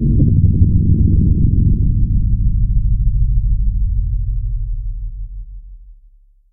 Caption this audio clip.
Low pitched FM synth "thunder." Is that a creature walking on the hull?
synth, creature